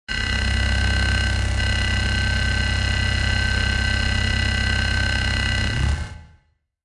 Striker Mid
sounds near moderat bass high and verb
harsh, digital, fx